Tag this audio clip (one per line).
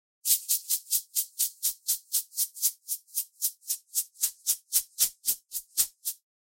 papaver
drum
shot
shaker
foley
one
perc-sound
shake
opium
percussion
perc-hit
groove
steady
gentle
shaking
poppy
drum-hit
nature
rhytm
rhythm
realistic
seeds